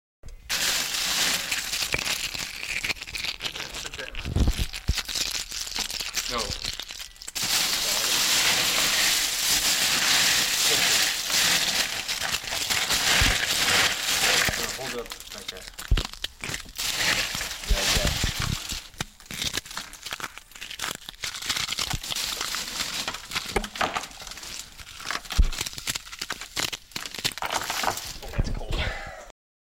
I recorded this sound back in 2002. Moving ice around with our arms. Some talking from my friend and I a little.
crunching, ice, sturring, mixing